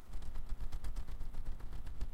Light Wing Flap
Used Blue Snowball to record flapping of thick paper, then sped up and repeated to produce an easily-looped light flapping sound. Originally produced to represent beating fairy wings in an episode of The Super Legit Podcast.
light, flutter, birds, hummingbird, flap, butterfly, fairy, fluttering, flapping, wings, fantasy, nature, bird, wing